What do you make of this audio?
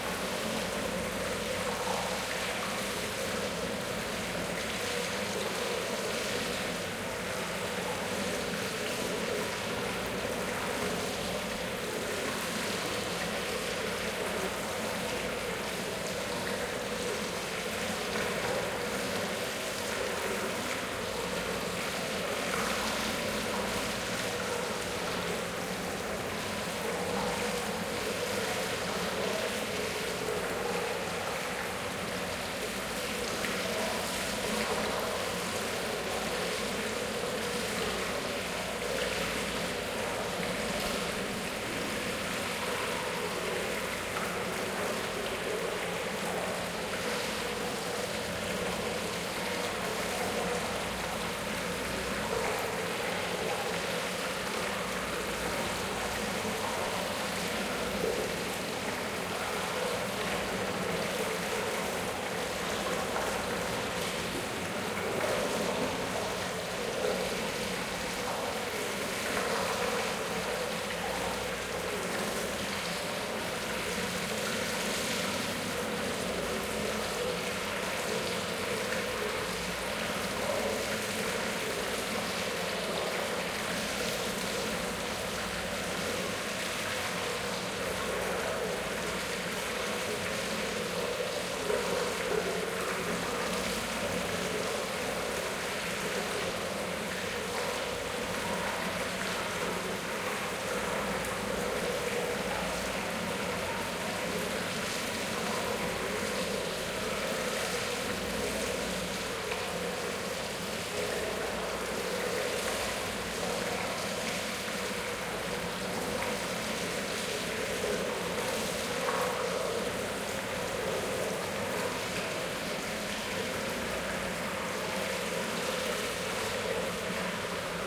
Roman baths water flows
Strasbourg old municipal baths, roman baths , the water flows.
1 boom schoeps MK41 close
2 and 3 Stereo Schoeps ORTF
liquid, water, roman, bath, drip